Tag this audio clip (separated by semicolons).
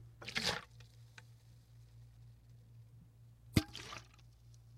bottle; sloshes